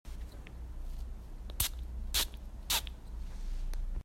Spraying perfume in the air (three times)
Perfume, spray, spraying, scent